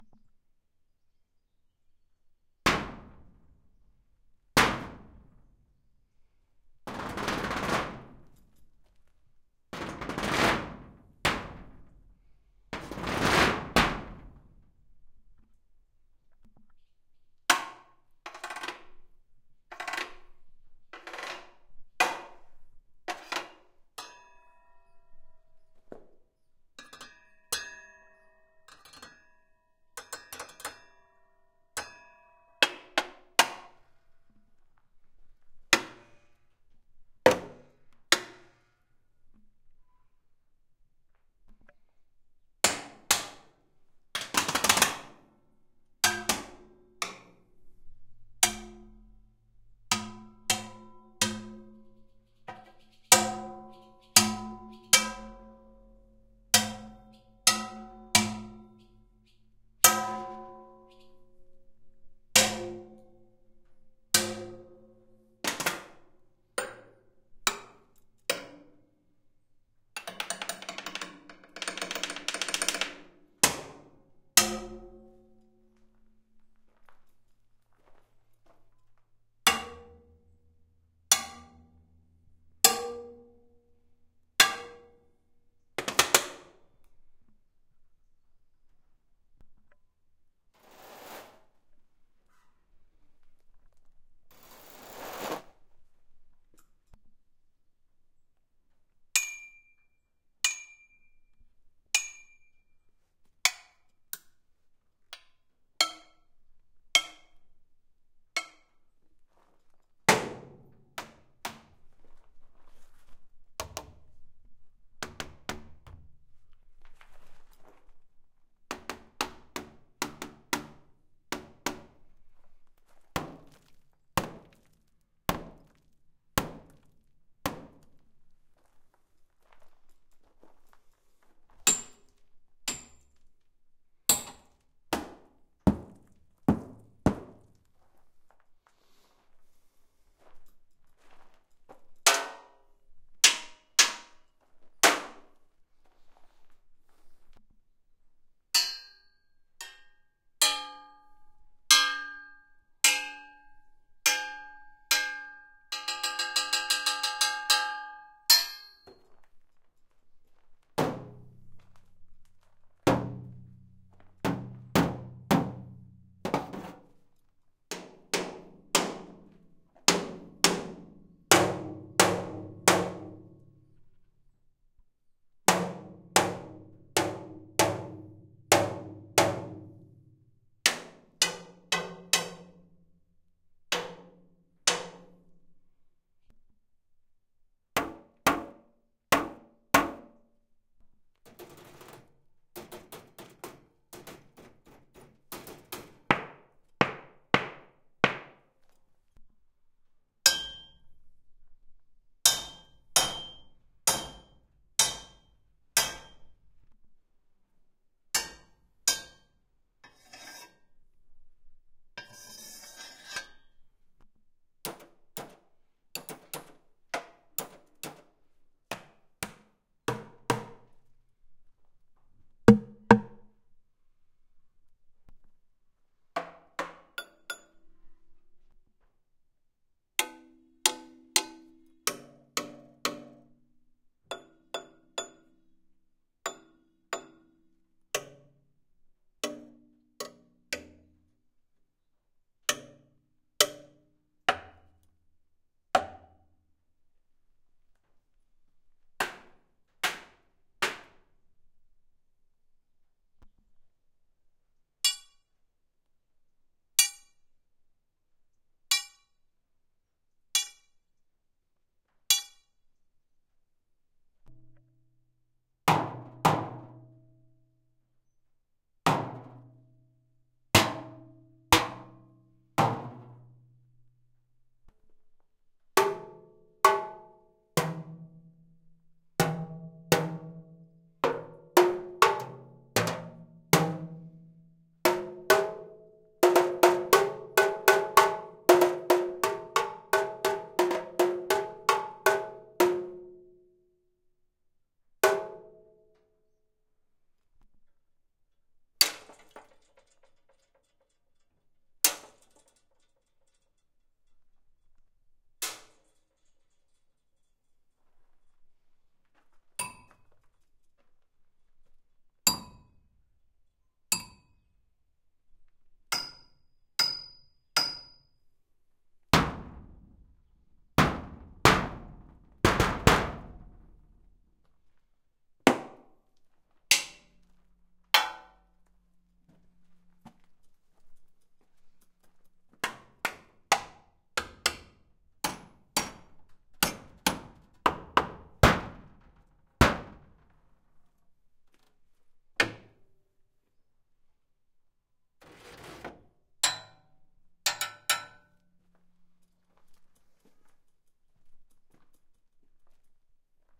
metal hits
hits, metal